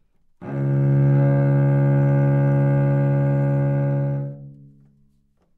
overall quality of single note - cello - C#2
Part of the Good-sounds dataset of monophonic instrumental sounds.
instrument::cello
note::Csharp
octave::2
midi note::25
good-sounds-id::1941
dynamic_level::mf